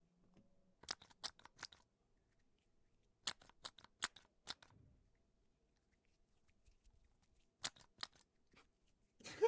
hand san bottle
kind of sounds like a pacifier but its a squeeze sanitizer bottle